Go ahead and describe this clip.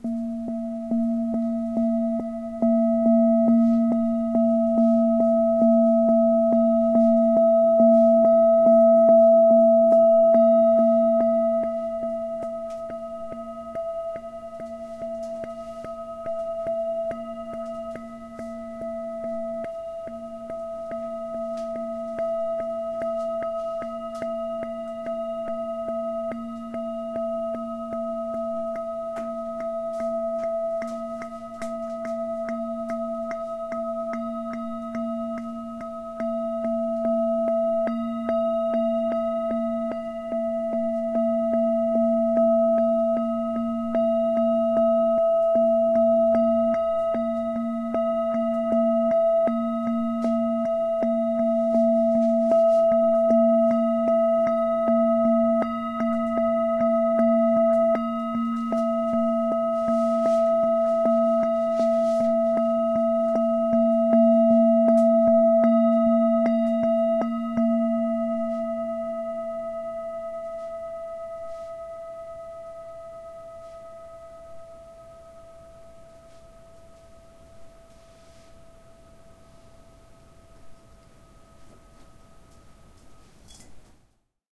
singing-bowl-beat
A singing bowl hit repeatedly with the leather part of the mallet.
harmonic, thalamus-lab, tibetan, tibetan-bowl